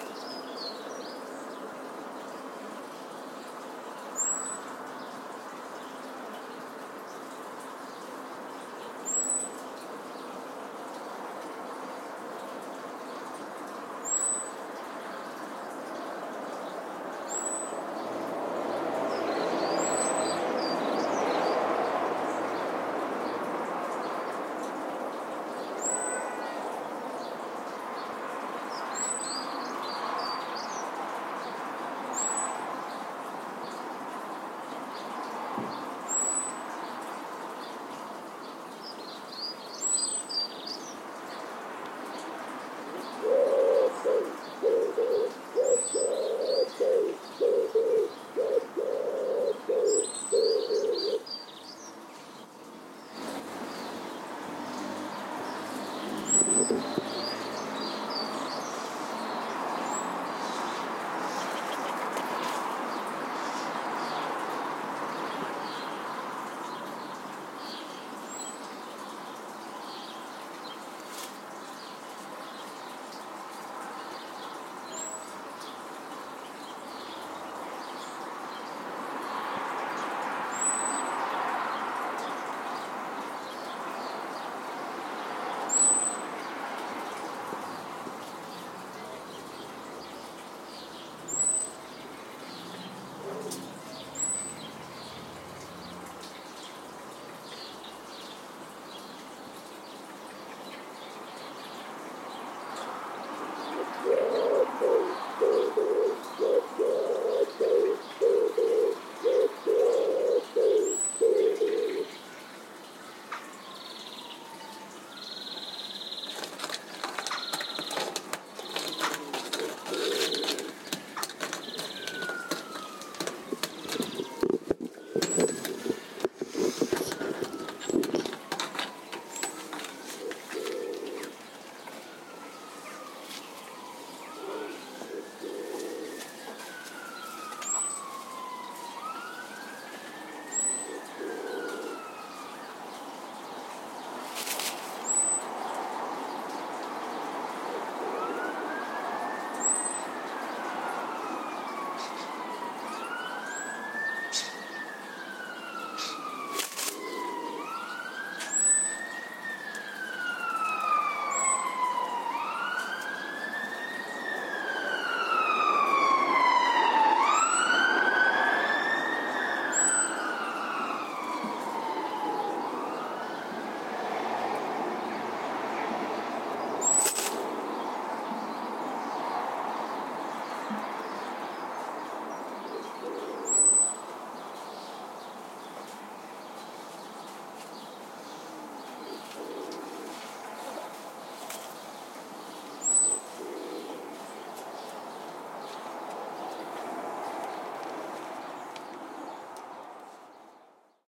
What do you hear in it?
Garden Ambience
For this recording a Placed a Microphone under some trees at the bottom of my garden That are populated with many birds. I live close to a main road and a variety of vehicles can be heard including an ambulance. my cat also makes a brief appearance and can be heard meowing and scratching some wood that was nearby. I Recorded this With a Rode NT-5 placed in a Rode MiniBoom With a regular microphone clip so there is some handling noise During the recording. The microphone was connected to a Zoom H6 Portable recorder.
A high pass Filter, Compression and Clip-gain was used during processing.
Ambient, Ambulance, Birds, Birdsong, Cars, Field-recording, Garden, Nature, owl, Peaceful, Rode-NT-5, Traffic, Truck, Village, ZoomH6